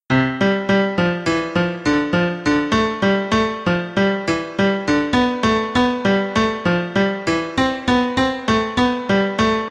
piano3 ex6 bad good
Piano dataset containing 127 audios from the 6 first exercises of Hanon's The Virtuoso Pianist, and the corresponding pitch and chroma labeling. It is used for the automatic assessment of piano exercises.
keyboard, piano, automatic-assessment